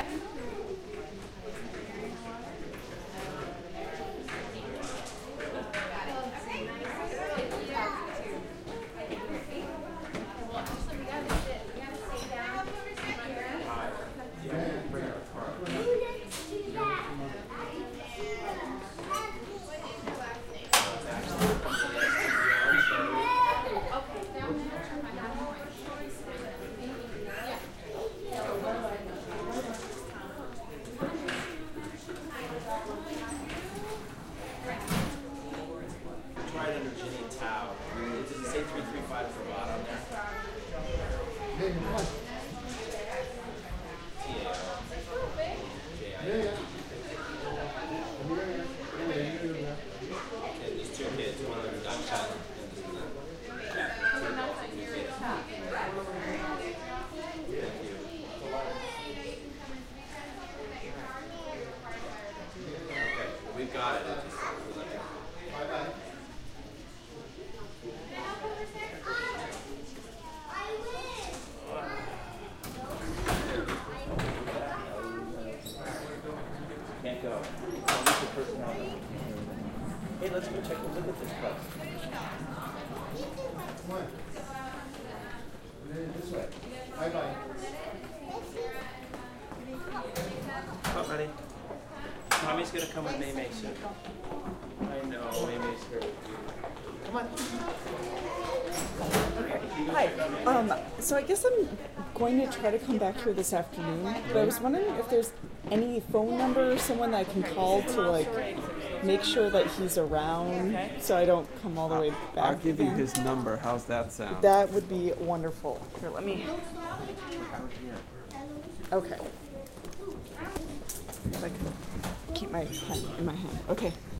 museum atrium
People entering the Bay Area Discovery Museum in San Francisco. Near the end of the recording a reporter comes to talk to me about how to get a hold of my boss.Recorded with the Zoom H4 on board mic sitting on a table.